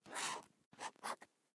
Felt tip pen drawing arrow 01
Drawing an arrow with an Artline 204 FAXBLAC 0.4 fineline pen. Recorded using an AKG Blue Line se300b/ck93 mic.
fineliner, pen, writing